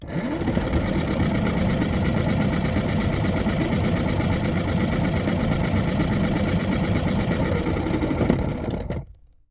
volodya motor 4
The heater in an old Volvo station wagon spins up, runs, and stops. It's very pronounced and, well, broken. Recorded in September 2010 with a Zoom H4. No processing added.